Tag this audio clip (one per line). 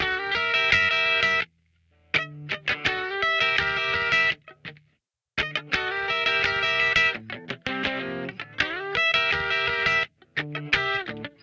funk
funky
guitar
phunk
riff